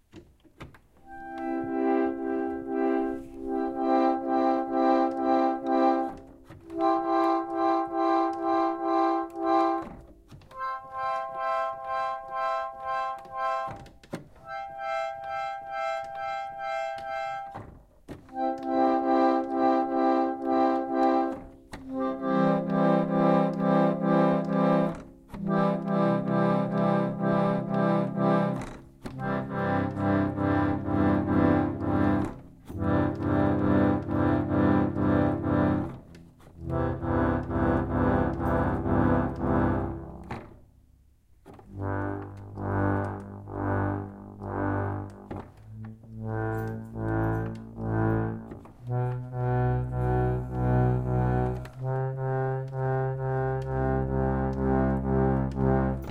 Pump Organ - C Major chords
Recorded using a Zoom H4n and a Yamaha pump organ, I played the C major chords across the keyboard.
organ, c, chords